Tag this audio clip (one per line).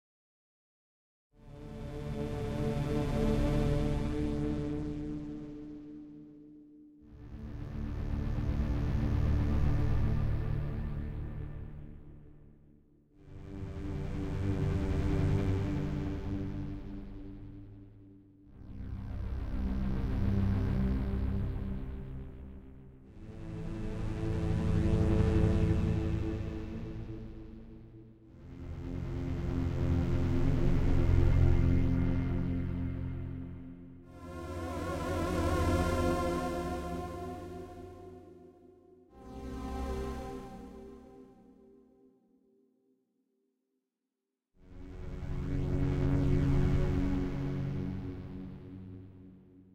scary
space
beam
evil
stranger
low
sci-fi
weird
scifi
laser
science
bladerunner
things
scan
creepy
fiction
droid
alien
epic
tone
futuristic